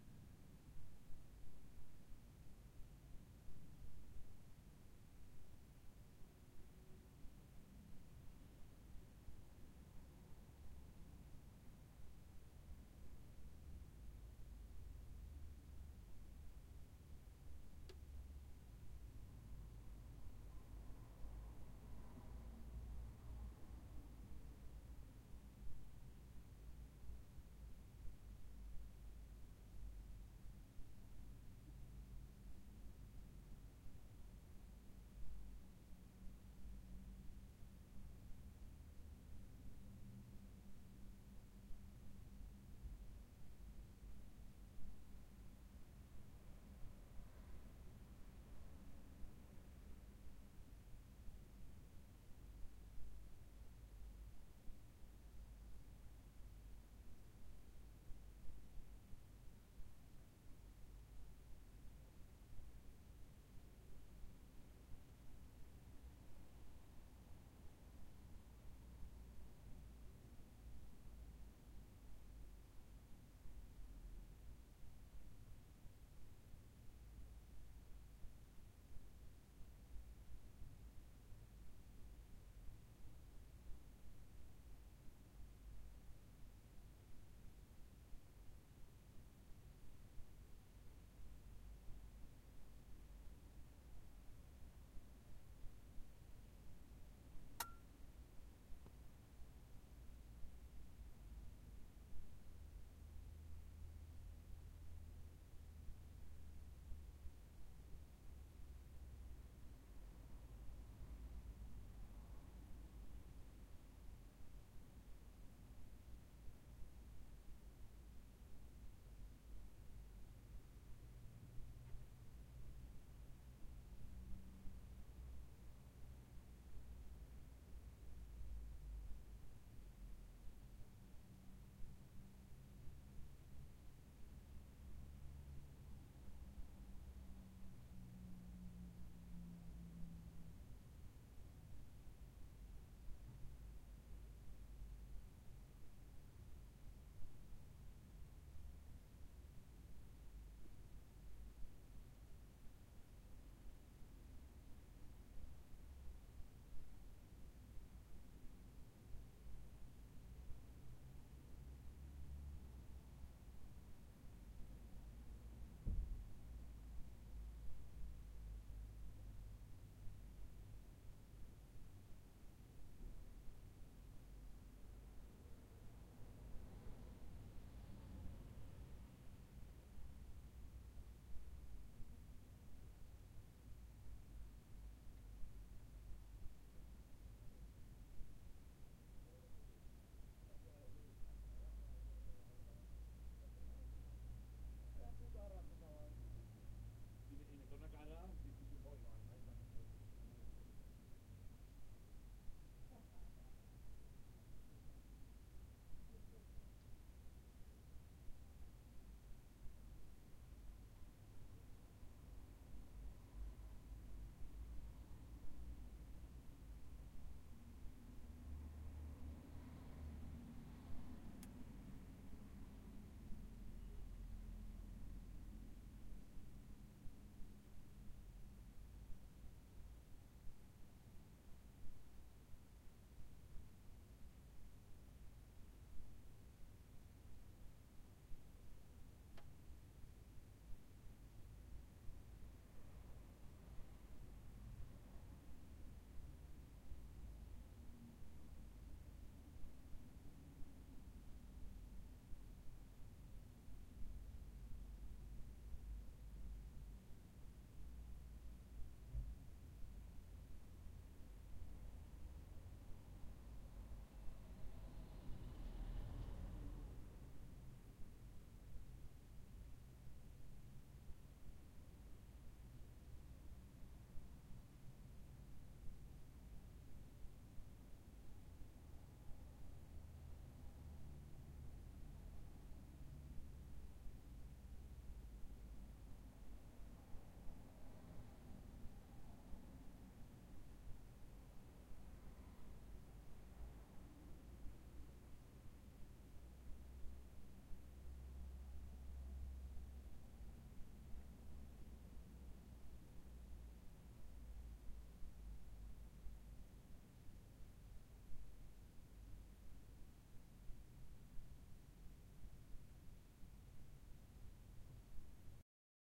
Interior car parked on the street
Sound from the interior of a car parked in the street